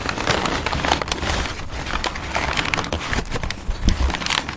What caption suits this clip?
CRUMPLED PAPER
It´s about the sound of a sheet of paper being wrinkled.